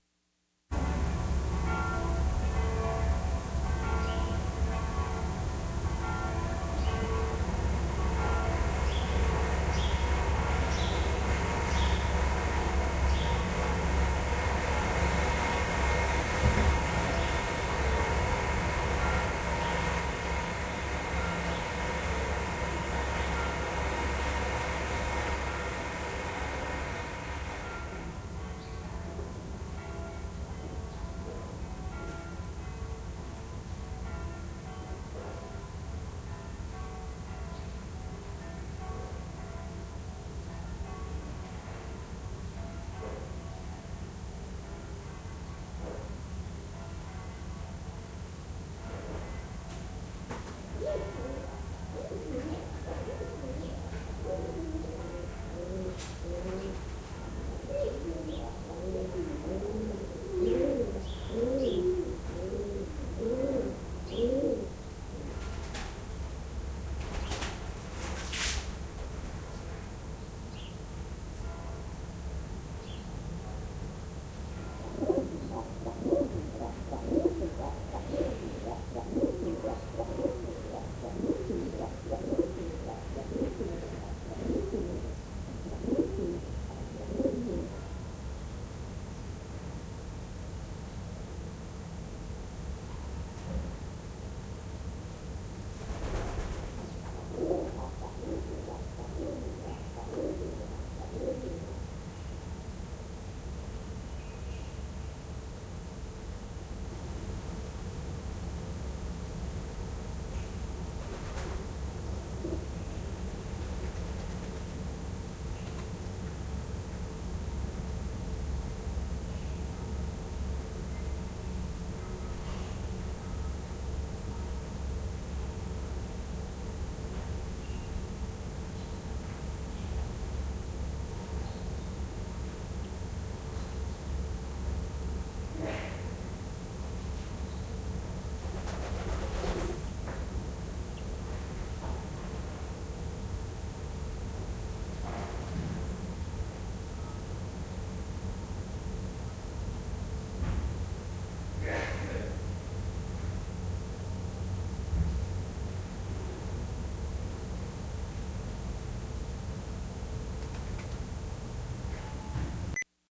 Venice-pigeons
Church bells and pigeons recorded in Venice Italy
field-recording, italy, animal, bird, space, street